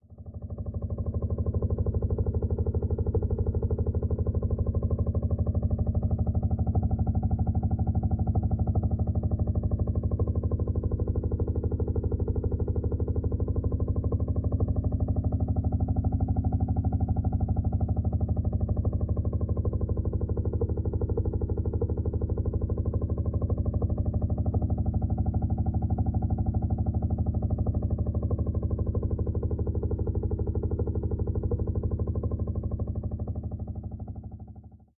Generated Helicopter
Sounds like a distant helicopter.